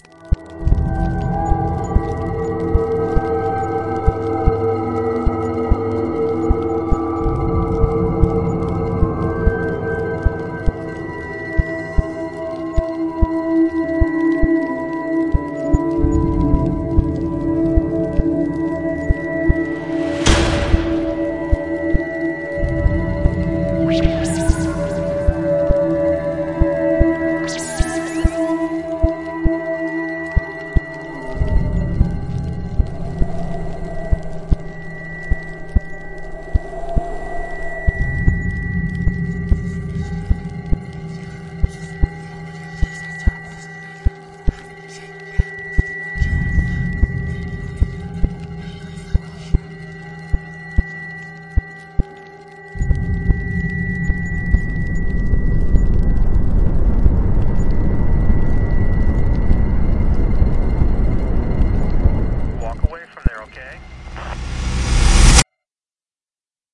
alien wreckage exploration
My idea for this track is when an astronaut is exploring an alien wreckage and his base realizes there is more than just a wreckage when he asks the astronaut to get of the area.
I added some phaser effects here. Here is the list of tracks i used to make this track.
10292013_mystery_space_data
I used audacity yo make the entire track.
-Rama
Mystery, space-travel, suspense